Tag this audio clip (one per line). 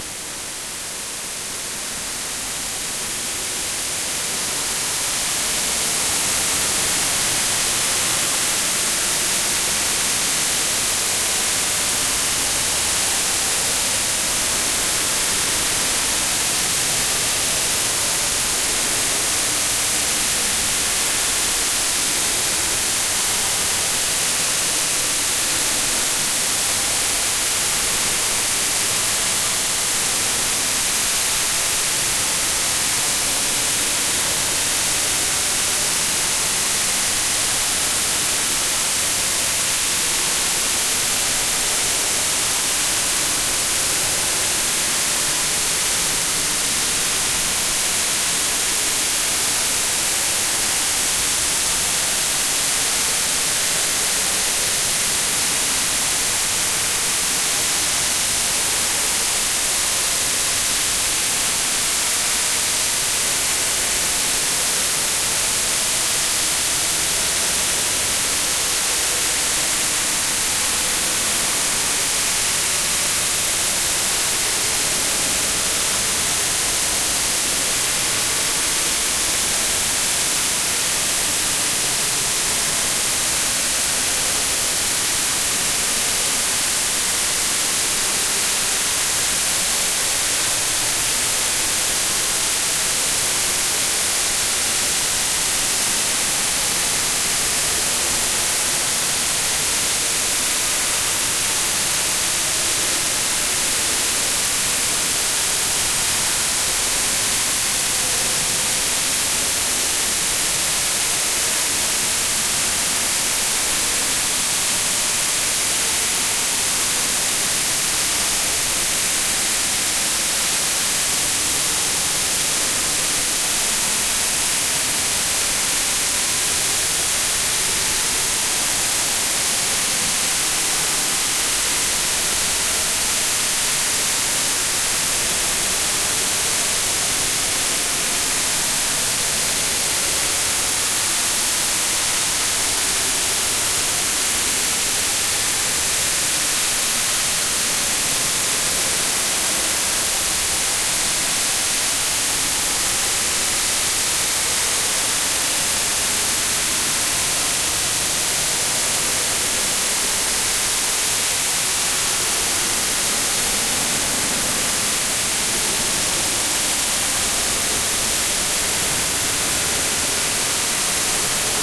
noise
sample
audacity